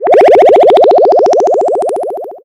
magic elevator
game, science-fiction, movie, transfer, warp, video, mechanical, trans, cartoon, animation, space, magic, film, machine